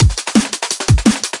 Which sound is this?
loop beat drum